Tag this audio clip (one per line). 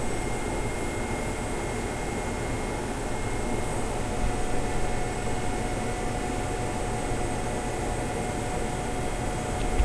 cold
freezer
fridge
refrigerator